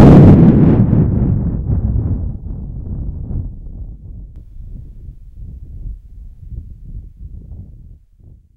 Quite realistic thunder sounds. I've recorded this by blowing into the microphone.
Lightning, Storm, Thunder, Thunderstorm, Weather